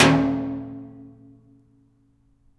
One of a pack of sounds, recorded in an abandoned industrial complex.
Recorded with a Zoom H2.
city, clean, percussive, urban, field-recording, metal, high-quality, industrial, percussion, metallic